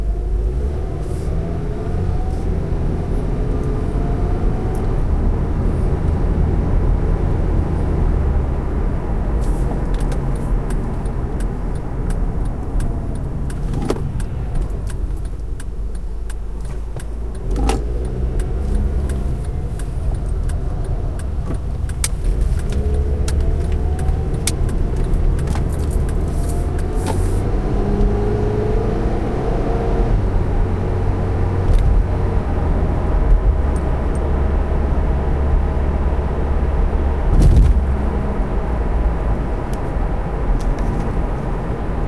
This was recorded on a Marantz PMD620 digital audio recorder using its internal mics. This clip is simply the sounds my car makes while I'm driving it.